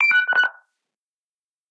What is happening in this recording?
Sci Fi UI Button Sound 010
These are some sounds you can use for your video game, or anywhere where you need some button sound effects.
User-Interface, Interface, Press, UI, Effect, Game, Button, Player, Click, FX, Video-Game, Machine, Sci-Fi